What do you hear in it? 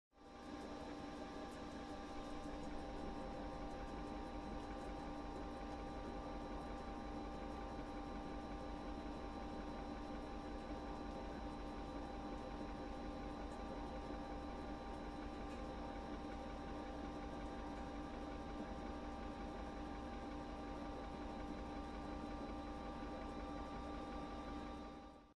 Washing Machine; rumble
The rumble of a washing-machine washing clothes.
clothes, machine, wash, water